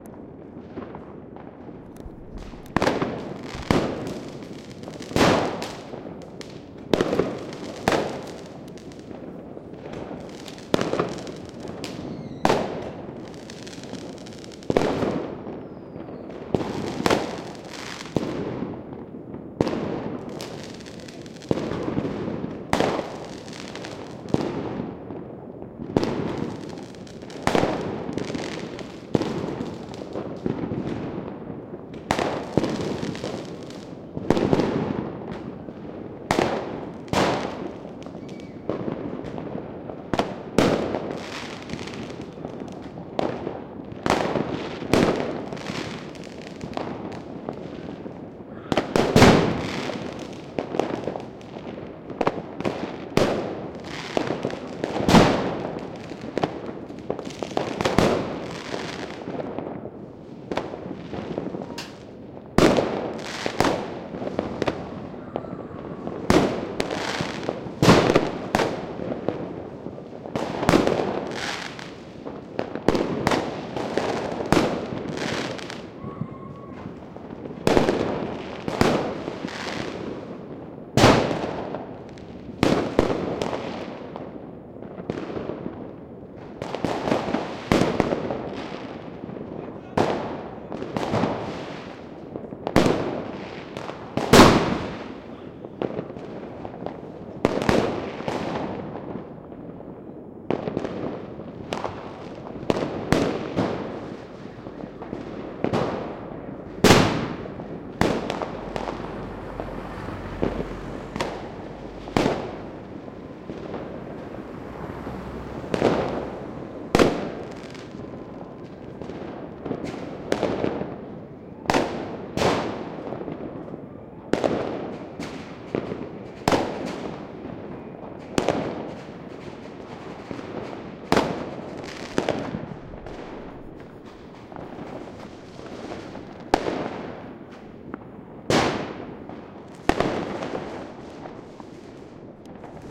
New Year fireworks from Tbilisi 23:59
sky, Tbilisi